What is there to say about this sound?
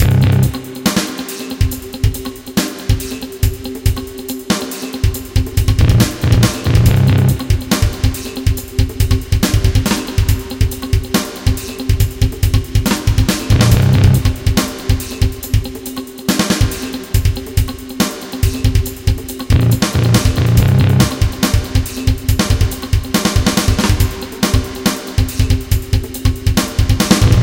Creepy, secret agent-ish loop. Loop was created by me with nothing but sequenced instruments within Logic Pro X.
dark, loop, creepy, sneaky, music, loops, agent, epic, secret